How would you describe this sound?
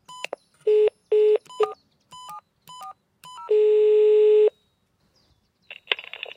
Using an intercom (Gegensprechanlage) in an office building: pressing a number code, followed by the call connected signal and the gentle click of someone answering the phone.